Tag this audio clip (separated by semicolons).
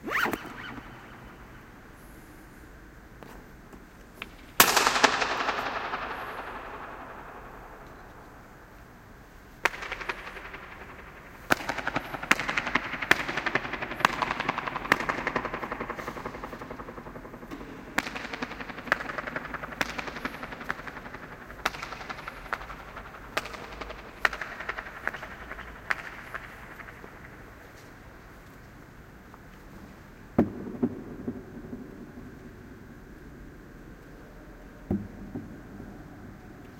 Echo; field-recording; Ploce; Croatia